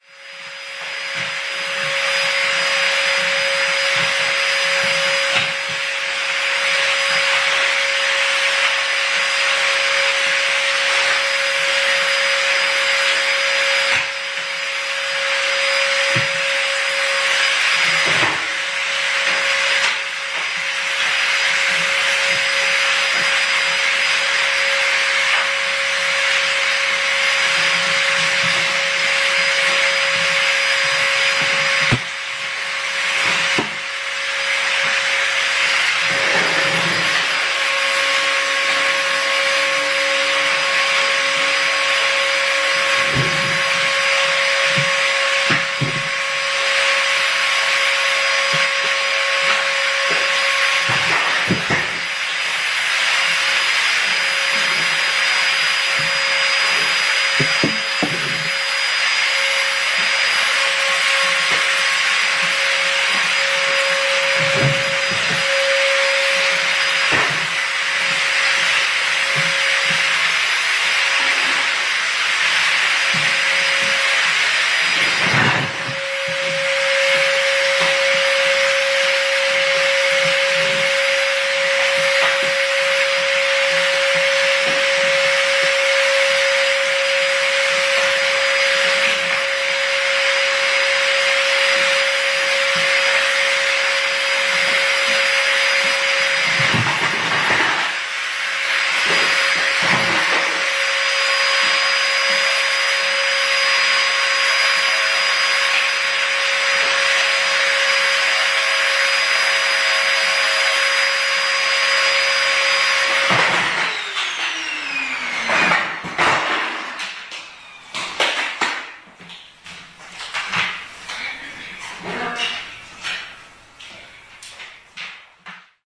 hoovering flat040910
04.09.2010: about 16.00. I am hoovering my dining room. At the end I am jerking the hoover's plug by accident. Poznan, Gorna Wilda street.
domestic-sounds, field-recording, flat, home, hoover, hoovering, noise, poland, poznan, tenemnt, wilda